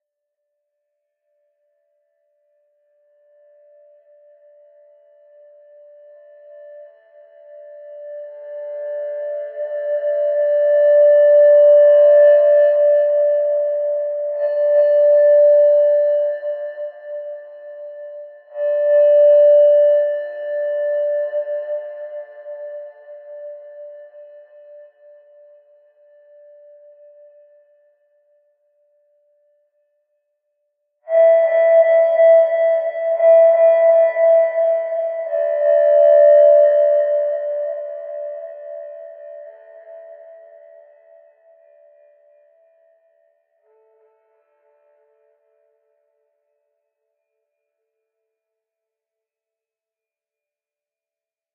Hymn Of Heaven
A short radiant soundscape. Can be used in any project. Enjoy :)